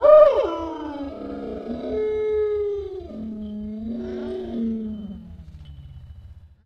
Processed Exuberant Yelp Howl 1
This is a processed version of the Exuberant Yelp Howl in my Sled Dogs in Colorado sound pack. It has been time stretched and pitch shifted. The original sound file was the happy cry of an Alaskan Malamute. Recorded on a Zoom H2 and processed in Peak Pro 7.
bark, dog, howl, husky, Malamute, moan, pitch-shift, sled-dog, time-stretched, wolf, yelp